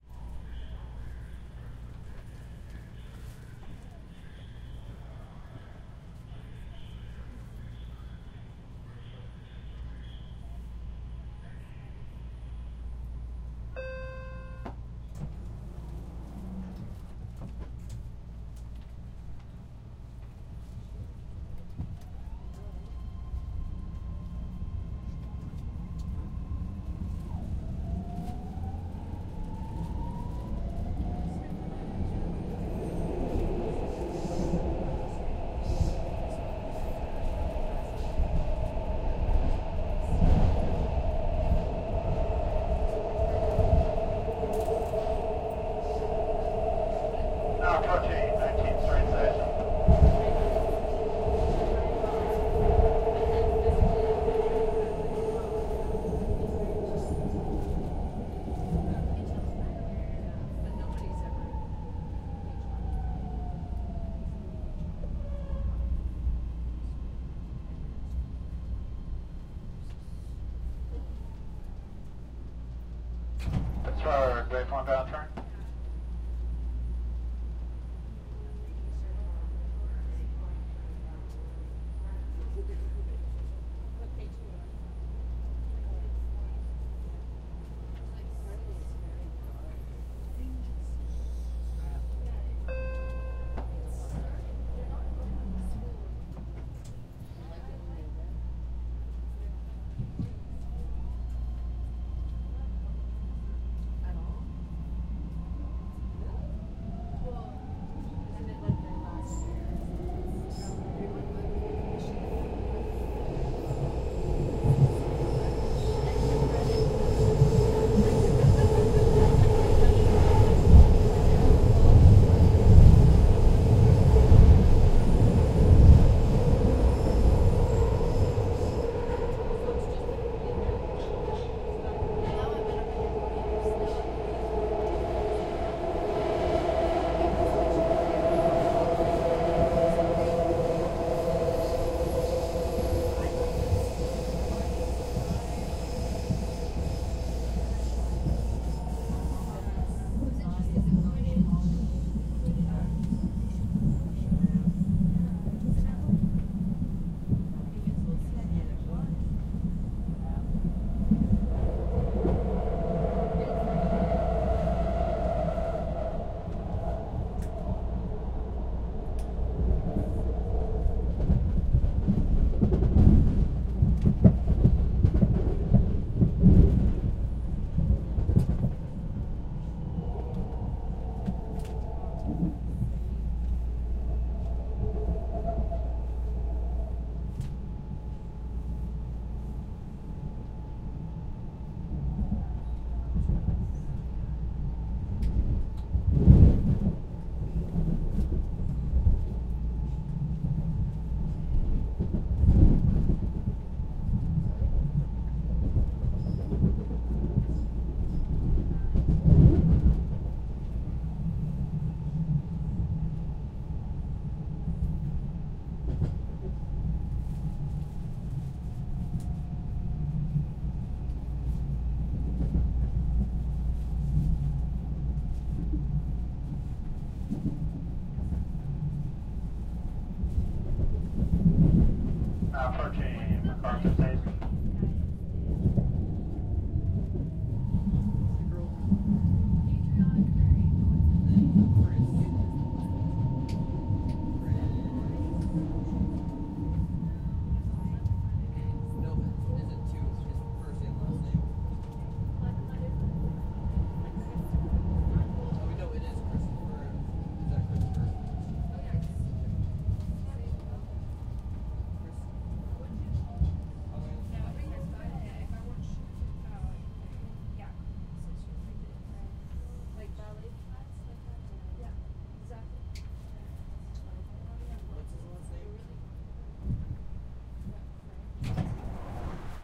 san francisco BART ride 01
While riding the BART (Bay Area Rapid Transit) in Oakland CA one afternoon, I made this recording of the interior of one of the trains. The recording lasts for a couple of stops, and you can hear the announcements, door sounds, train sounds, and conversation of the people around us. This recording was made using a Zoom H4 on 5 August 2007.
field-recording, subway, mass-transit, bart, oakland, san-francisco, interior